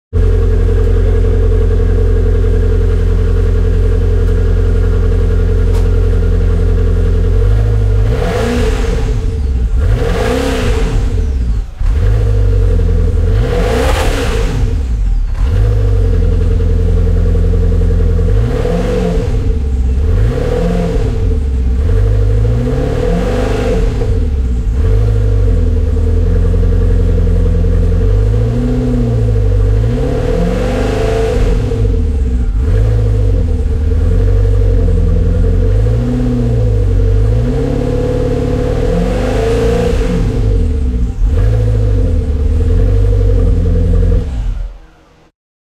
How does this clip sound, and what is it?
Maserati Exhaust 1
ignition, sports